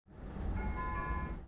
4 Tram station
CZ, Czech, Pansk, Panska, Tram, Tram-station